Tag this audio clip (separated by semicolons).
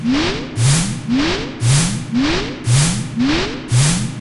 steampunk; steam; machine; mechanical; engine